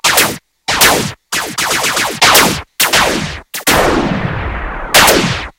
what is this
Classic laser shots for Retro space warfare.
explosion, gun, shooting, arcade, boom, retro, bang, blast, space, shoot, shot, laser, sci-fi, lazer, weapon